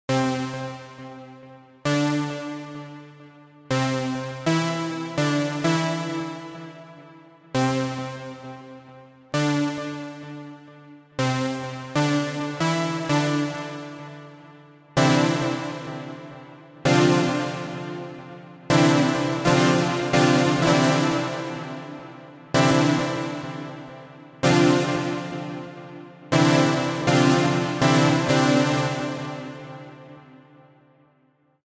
Synth Jingle I created in Soundtrap.